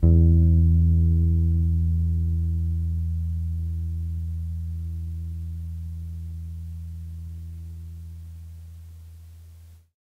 Tape Bass 8
Lo-fi tape samples at your disposal.